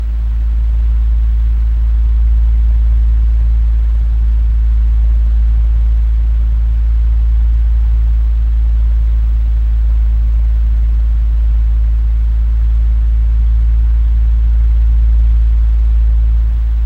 Closeup of an electric fan recorded with laptop and USB microphone in the bedroom.
fan, noises, electric, foley, bedroom